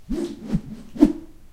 Writing "Z" for Zorro in the air
I use a bamboo stick to generate some wind *swash* sounds. I used following bamboo stick:
Find more similar sounds in the bamboo stick swosh, whoosh, whosh, swhoosh... sounds pack.
This recording was made with a Zoom H2.
zoom, stick, air, wind, weapon, swish, punch, swosh, whip, cut, flup, zoom-h2, swash, swoosh, luft, public, bamboo, domain, wisch, swhish, swing, attack, h2, woosh, whoosh, wish